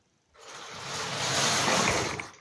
Lego Swish 01
Swishing my hand through lego on the floor